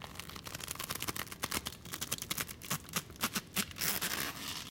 When you twist paper into a tight column, it makes this noise as you try to twist it further.